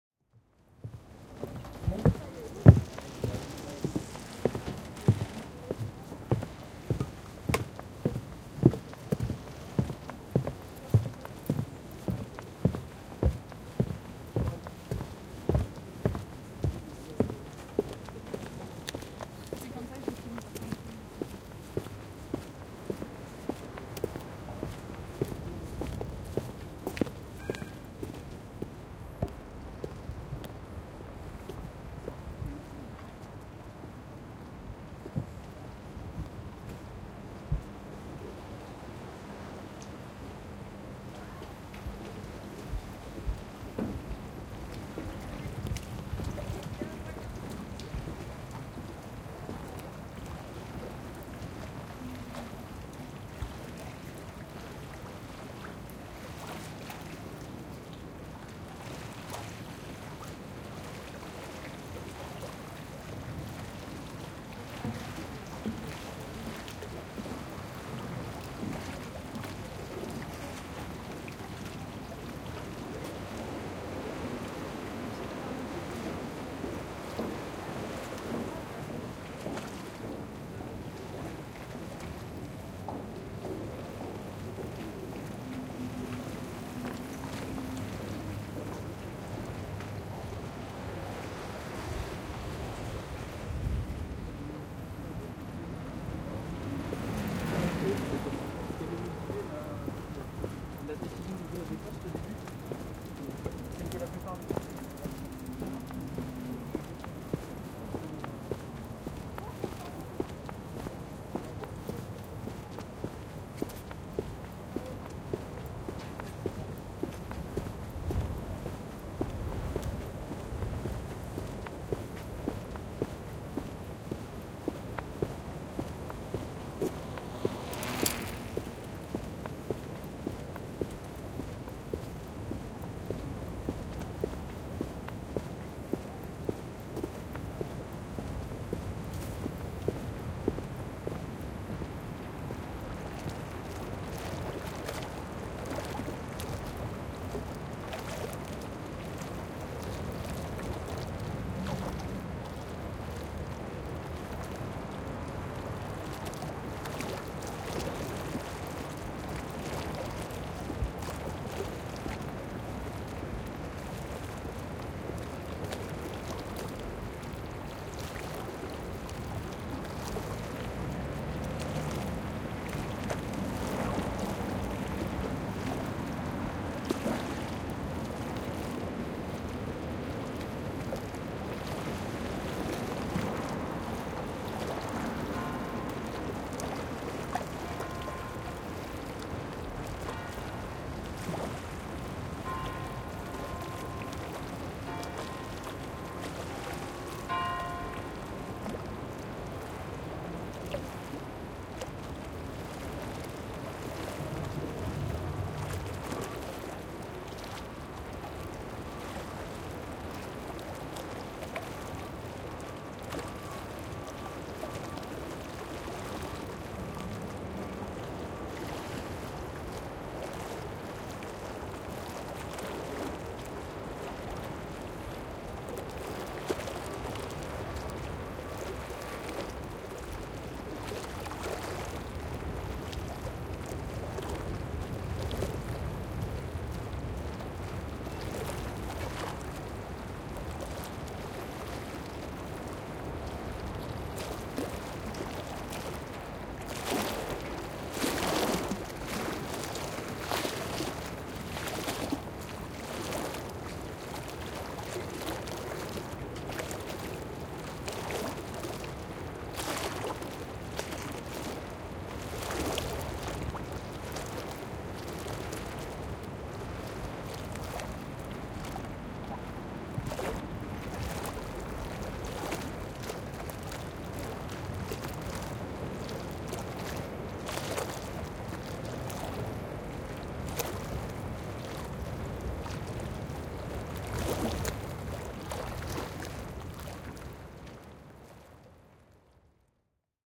Entre La Passerelle du Bassin des Chalutiers et le quai de la médiathèque
Fin d'après midi de tempête, déambulation sur la passerelle du bassin des Chalutiers jusqu'au quai de la médiathèque de La Rochelle.
Des pas sur le pavé, puis sur la passerelle puis re pavé, de l'eau
Clapotis de la mer sur le quai en pierre
MixPré6II de Sound Device avec un couple de DPA 4021 dans une rycotte ORTF